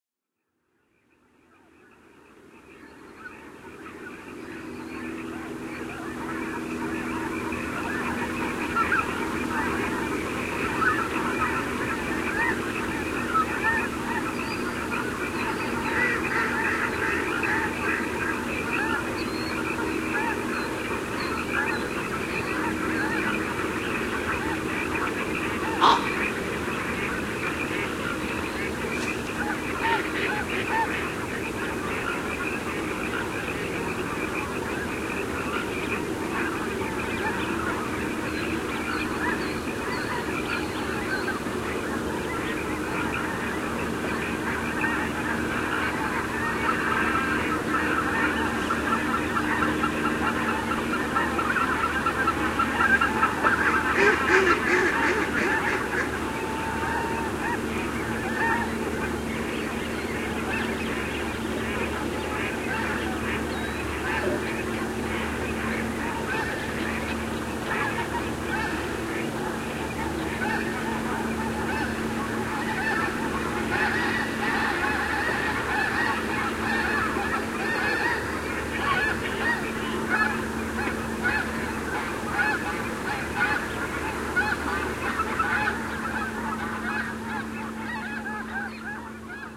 ag20jan2011t47
Recorded January 20th, 2011, just after sunset. This one has a little bit of a lot. Frogs, Fulvous Whistling Duck, Snow Geese. Mallards.
anas-platyrhynchos, chen-caerulescens, dendrocygna-autumnalis, frogs, fulvous-whistling-duck, mallard, sherman-island, snow-geese